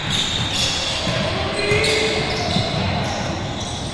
Basketball shoes 15
Squeaking noise produced by friction with the shoes and the wood floor.